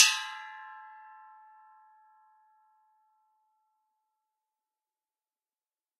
Gas-bottle - Clang
Gas bottle (20 kg) hit with a hose coupler once.
2bar, 80bpm, bottle, bright, gas, hollow, metal, metalwork, steel, tools